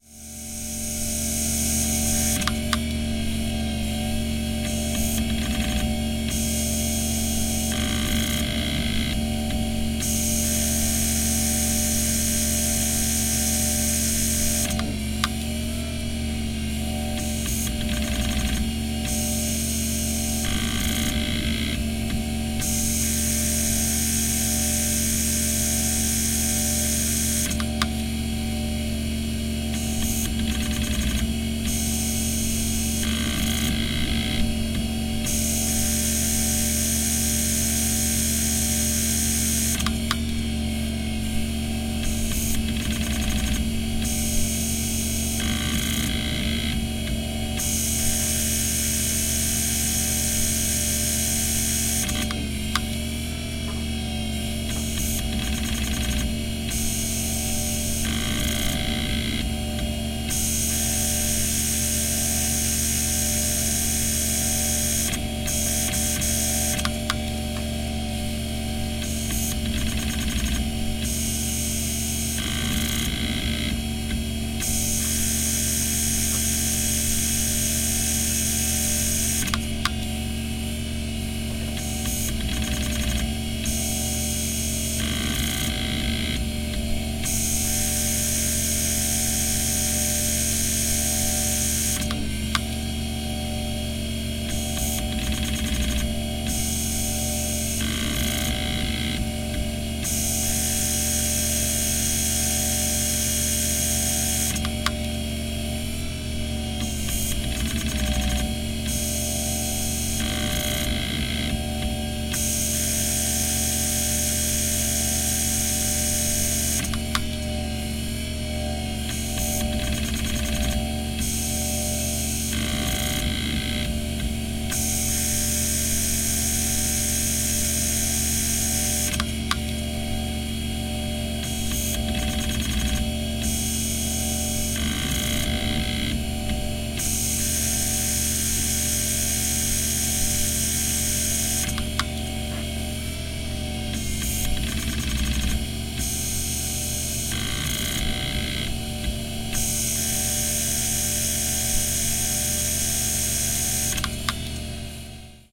Old crashed hard drive
ambient, computer, disk, drive, fail, H1N, hard, hard-drive, hdd, machine, motor, rattle, scraping, Zoom